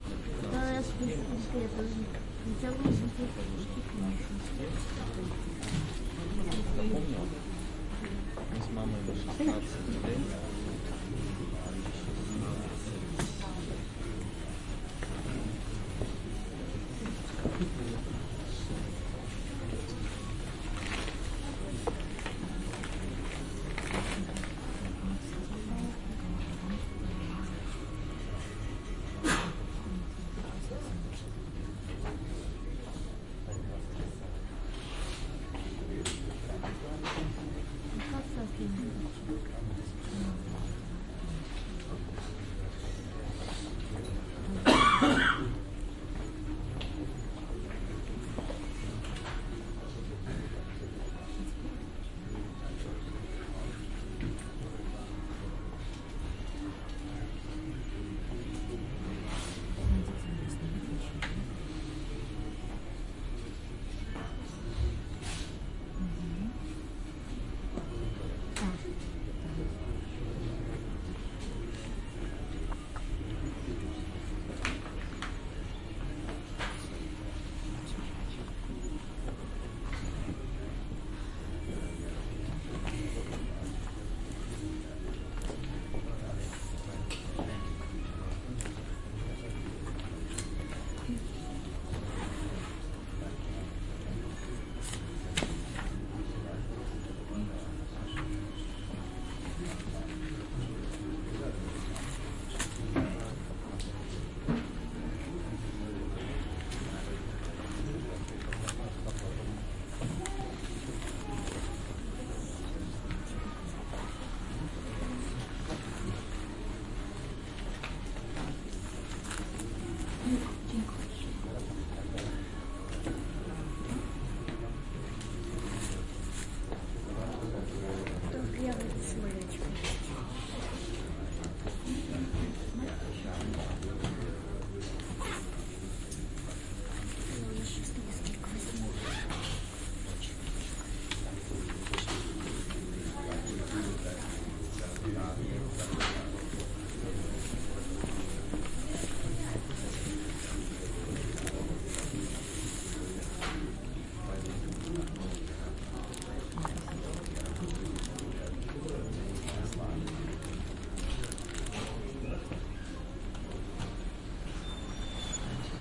wating at airport in belarus
It is 5 o'clock in the morning and we all wait for the plane to vienna. this binaural recording takes place at minsk international airport in belarus.